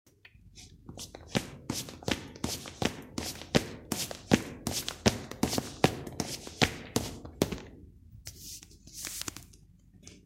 Running indoors on a concrete floor